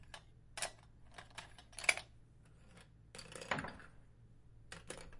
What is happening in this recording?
Handling pens, recorded with Zoom h1n.
pen, ink